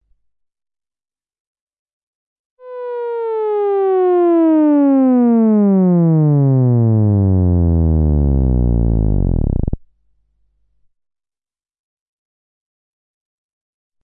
Moog Theremin Sweep 3
Moog Theremin recorded sweep.
Riser, Sweep, Effect, Moog